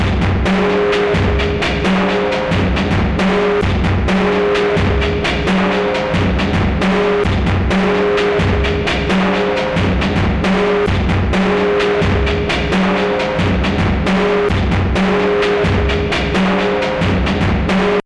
distorted drums beat
live drums, spliced into a loop and heavily distorted. recorded using a Zoom H4n digital audio recorder.
drums, drumloop, distorted